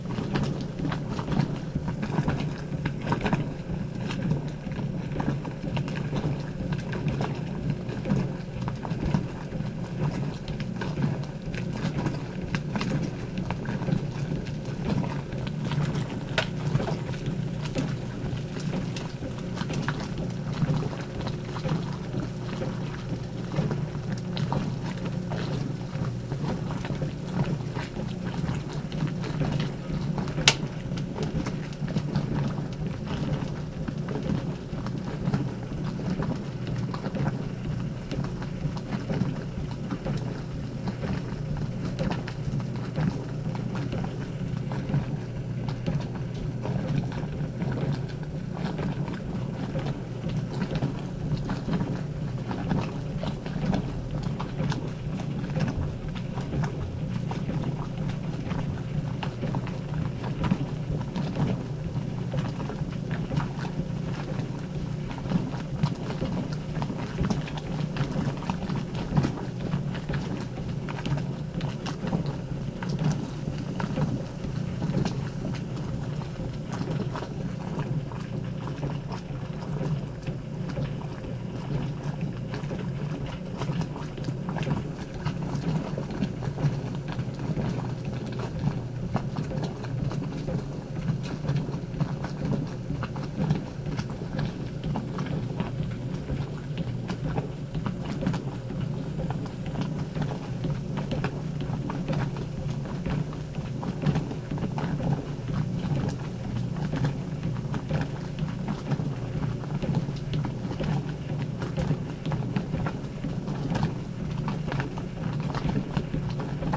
SFX- Lavadora Vieja - Héctor Bakero
Folie Old Washer old washing machine Lavadora Vieja Roomtone